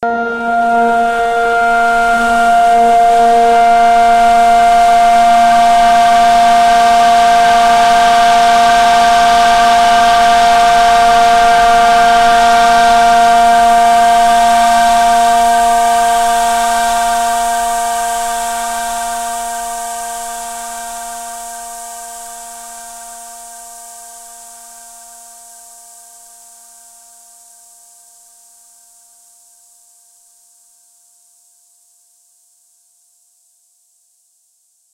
"Alone at Night" is a multisampled pad that you can load in your favorite sampler. This sound was created using both natural recordings and granular synthesis to create a deeply textured soundscape. Each file name includes the correct root note to use when imported into a sampler.
ambient, granular, multisample, pad, rain, strings, synth, tremolo